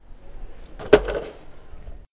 A longobard hitting the ground in the Guttenberg square outside the bar.